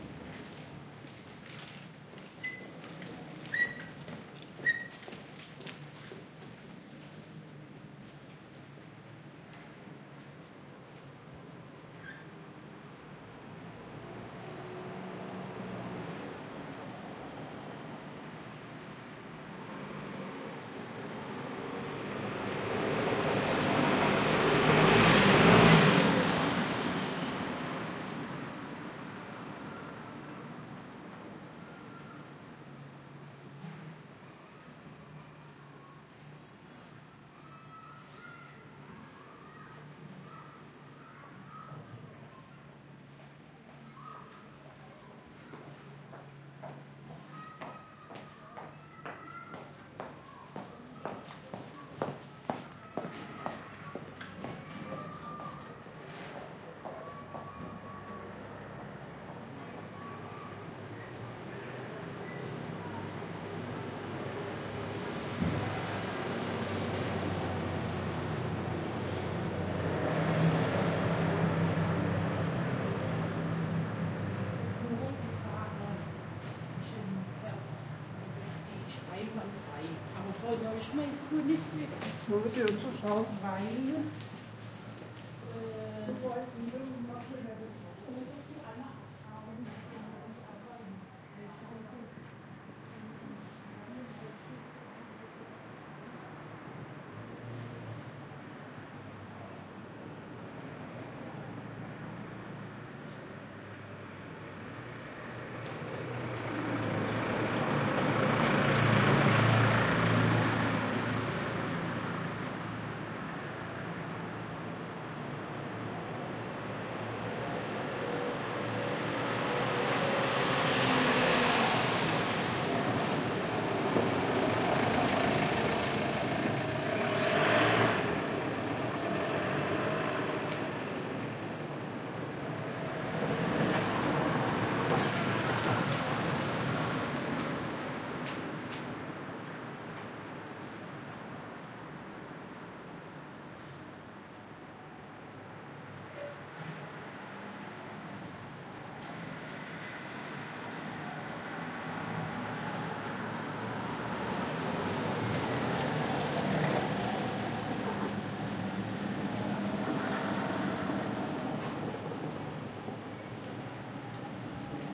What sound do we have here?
Pedestrians and cars pass through narrow doorway to
UNESCO World Heritage Kloster Maulbronn
Recording: November 2008; Tascam HD-P2 and BEYERDYNAMIC MCE82; Stereo;